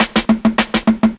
Fill 02 103bpm
Roots onedrop Jungle Reggae Rasta
Jungle, Rasta, Reggae, Roots, onedrop